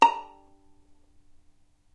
violin pizz vib A#4
violin pizzicato vibrato